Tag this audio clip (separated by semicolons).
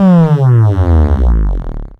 analog; analog-percusion; bass; drum; filter; percussion